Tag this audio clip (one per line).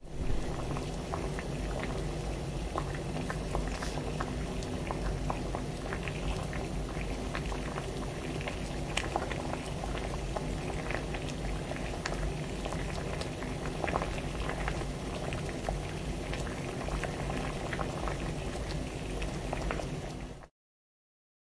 boiling
pot
kitchen
cooking
water